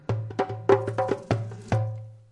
Level completed
This is sound for the games. You can use it in the gameover menuor completed menu or anywhere you want
game, completed, instrumental, effect, sound, won-game, gamedev, fx, beat, 2020, menu